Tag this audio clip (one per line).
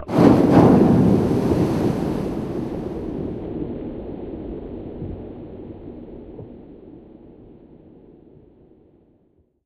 dive
underwater